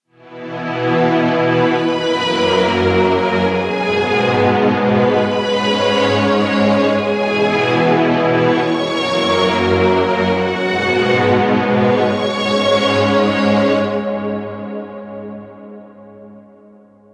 185347 lemoncreme symphony-sounds mono
fl-studio, melody, music, musical, string-orchestra, Symphony, synth